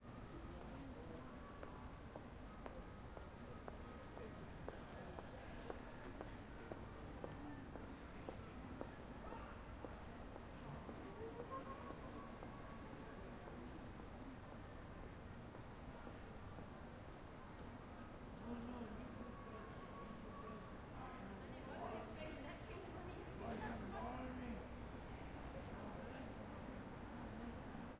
Crowd Noise Night 3
A selection of ambiences taken from Glasgow City centre throughout the day on a holiday weekend,
H6n, crowd, Walla, Street, Zoom, traffic, people, Ambience, Glasgow, City